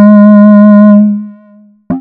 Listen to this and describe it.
This sample is part of the "Basic triangle wave 1" sample pack. It is a
multisample to import into your favorite sampler. It is a really basic
triangle wave, but is some strange weirdness at the end of the samples
with a short tone of another pitch. In the sample pack there are 16
samples evenly spread across 5 octaves (C1 till C6). The note in the
sample name (C, E or G#) does indicate the pitch of the sound. The
sound was created with a Theremin emulation ensemble from the user
library of Reaktor. After that normalizing and fades were applied within Cubase SX.